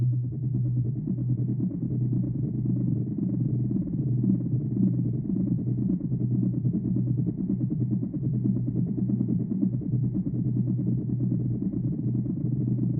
S003 Helicopter Mono
Sound of a helicopter hovering overhead
Helicopter, pulse